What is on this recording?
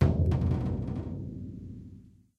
Dropping Drumstick On Skin
drop, drum, percussion, stick